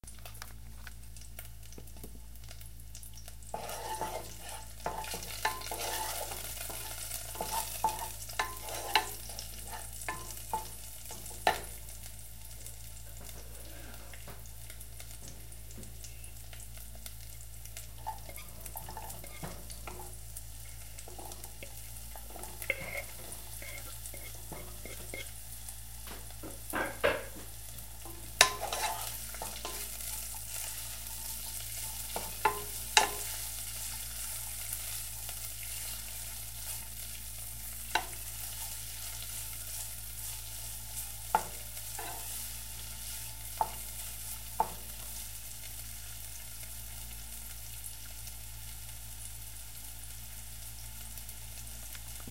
1029-sauteeing garlic
Sauteeing garlic and red peppers in oil.
cooking, food, oil, sizzling, vegetables